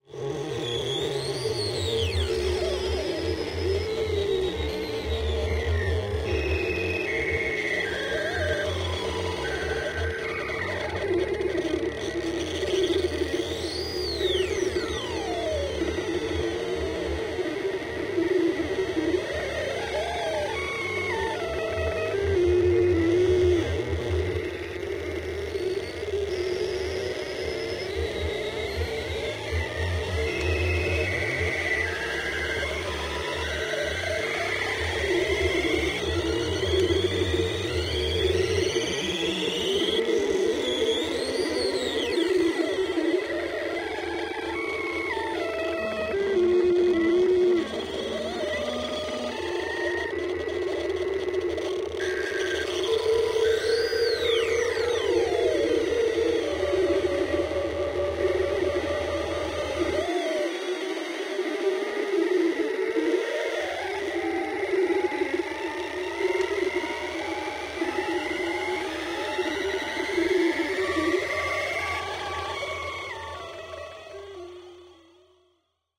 This is the sound of a multi-tracked circuit bent toy piano.
Listen. Download. Enjoy.